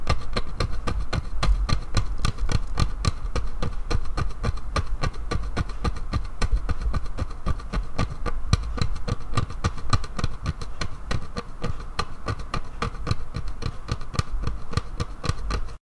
Scratching wood
scratching the edge of a longboard deck
longboard, scratching